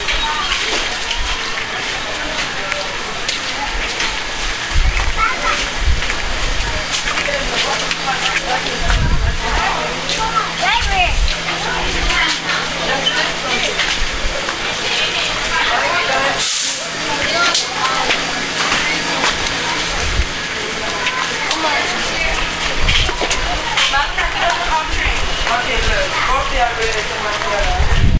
Walking away from a subway train through a crowd.